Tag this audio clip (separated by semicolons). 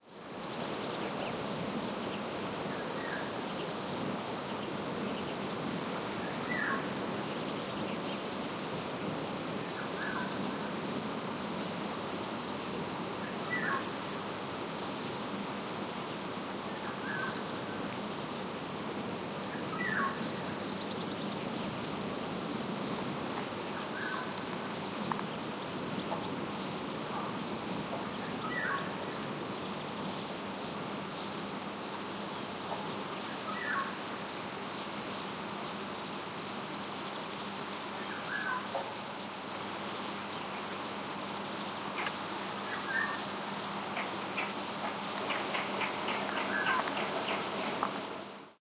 birds; field-recording; forest; nature; oriole; Oriolus-oriolus; spring; wind